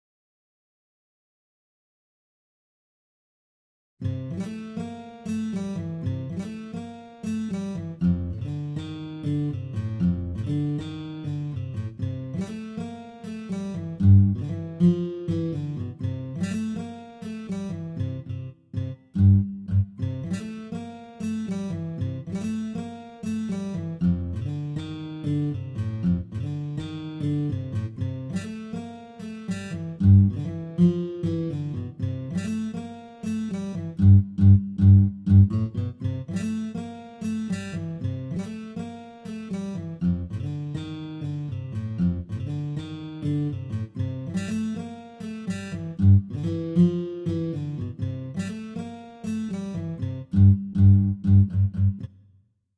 Boogie Guitar loop
The single track of one of the guitar loops that I played.
loop, Guitar, Boogie